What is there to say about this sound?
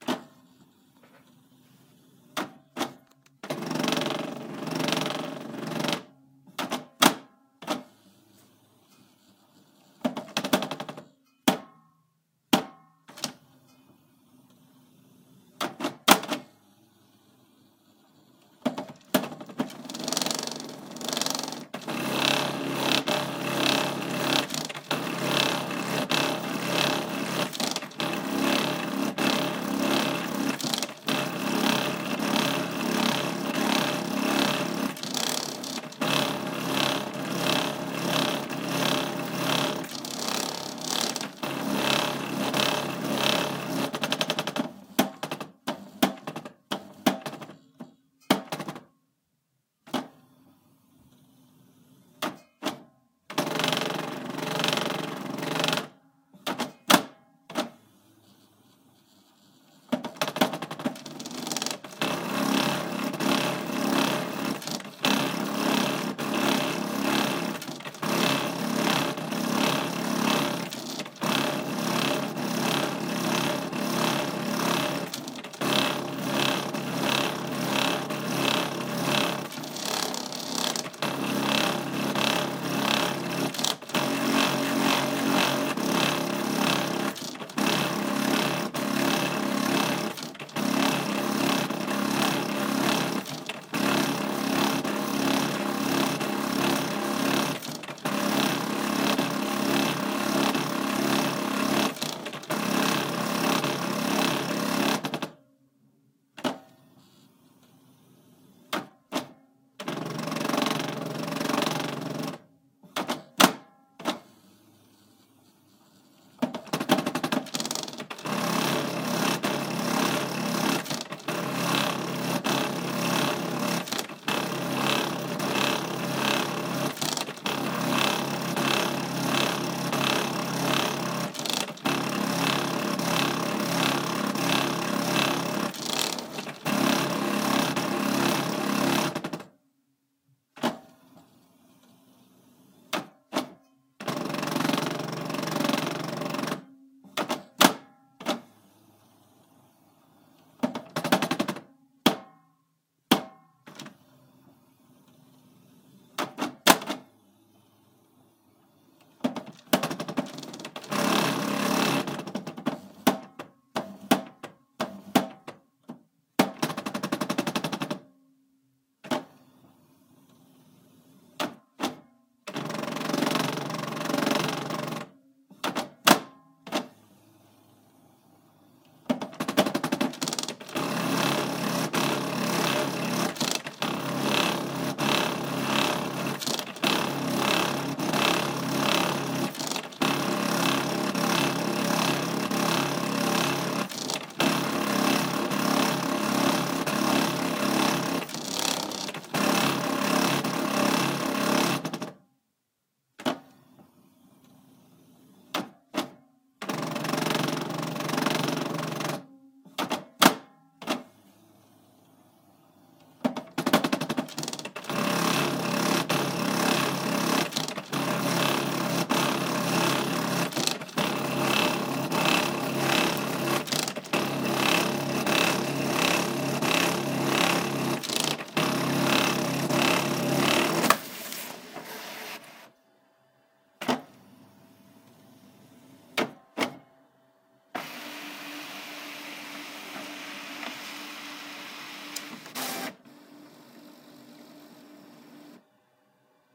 Broken printer, stalled machine
Broken printer trying to print
broken, error, fax, jammed, machine, printer, stalled